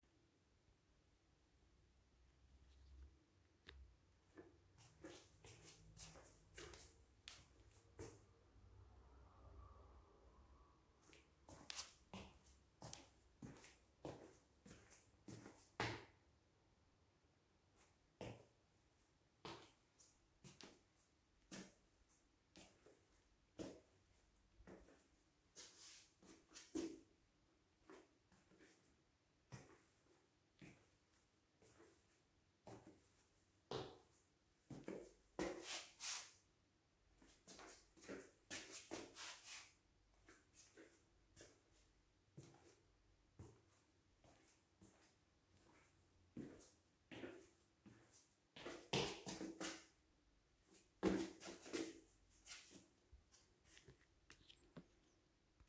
Indoor footsteps foley
Passi dentro registrati dentro casa
My footsteps recorded by samsung galaxy a51
walk, footstep, walking, step, concrete, indoor, footsteps, running, feet, steps, foley, foot